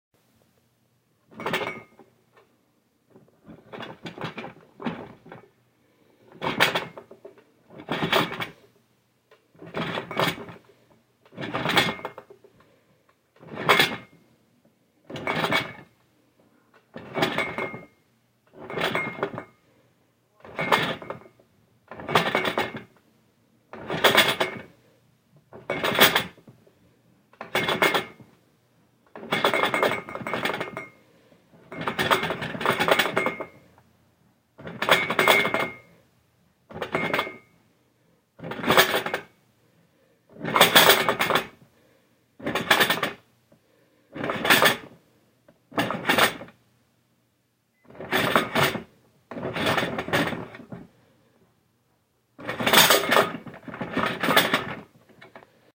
Table, plates, and cup and carafe shaking. Could be used for being knocked into or someone grabbing the table.